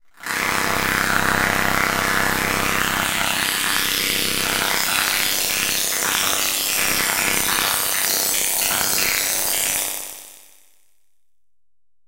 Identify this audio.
Granulated and comb filtered metallic hit